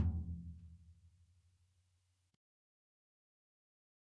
Dirty Tony's Tom 14'' 010
This is the Dirty Tony's Tom 14''. He recorded it at Johnny's studio, the only studio with a hole in the wall! It has been recorded with four mics, and this is the mix of all!
punk, pack, drum, heavy, realistic, 14, tom, raw, 14x10, real, drumset, metal